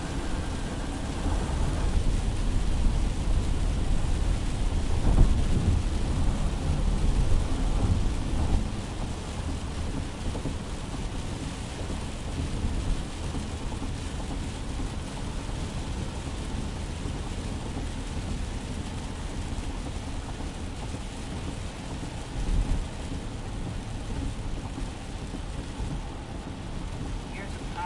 A sound bite of a Dodge Caravan driving through a heavy rain. Listen to the pitter-patter of rain on the window. Would make for a good sleep aid if looped. Recorded via a Zoom H4N.